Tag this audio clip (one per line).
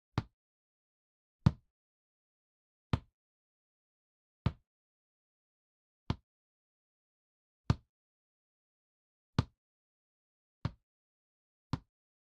ball
Czech
Panska
Tennis
Hit
CZ
Pansk
Ground
Sport